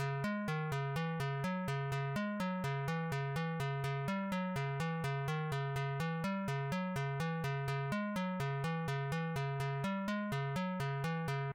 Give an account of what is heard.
FL Short Melody Loop 01
Short simple clean melody loop.
Thank you for the effort.
awesome, cool, effect, fl, fruity, fruityloops, great, library, music, short, simple, soundeffect